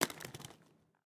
Skate-concrete 4
Foleys, Rollerskates